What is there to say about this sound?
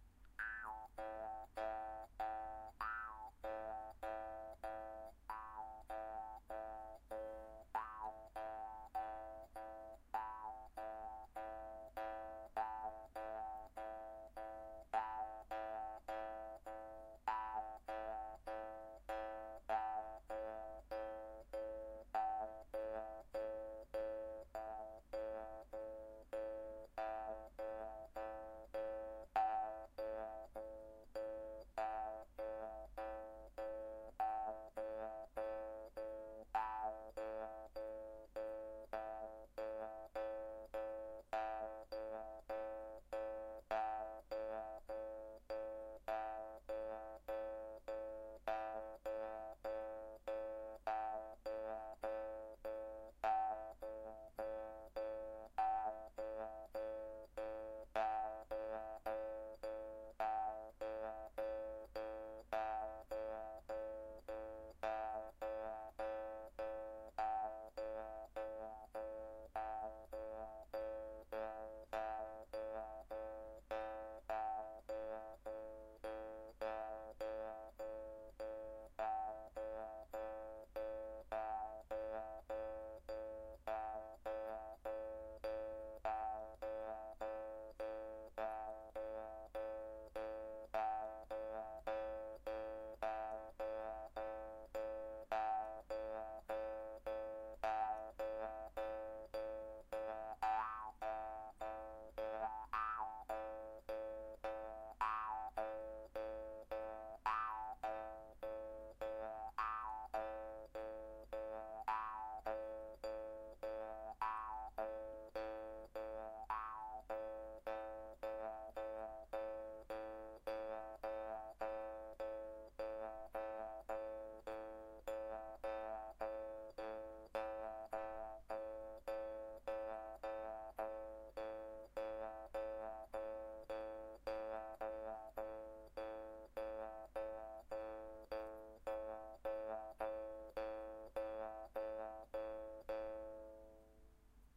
Me trying to make some sort of sensible rythm on a jaw harp for a project I'm working on.